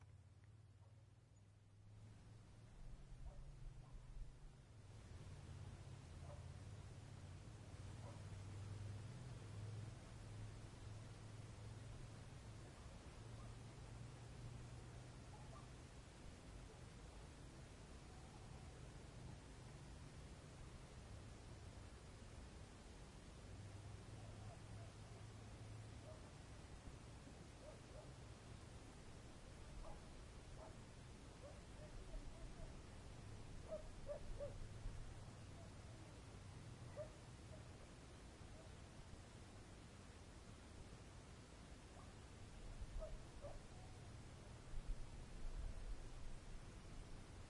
greece naxos white noise 4
General ambient sound at the top of an hill near Apiranthos in Naxos island. Some wind and a dogs.
wind, greece, white, birds, naxos, apiranthos, noise, dogs